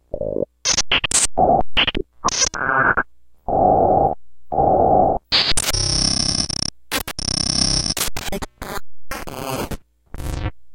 A really destroyed beat from an old drum machine processed with Nord Modular and other effects.